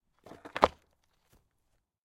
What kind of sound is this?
Long board stake, hard wheels. Recorded with a Rode NT4 on a SoundDevices 702